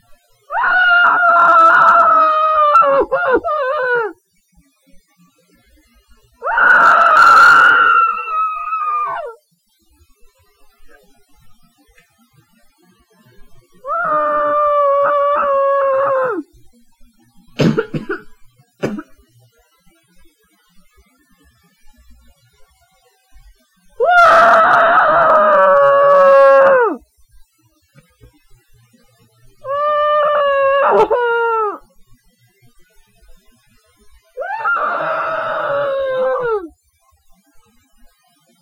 screaming into a pillow. I didn't want anyone to think I was actually in trouble XD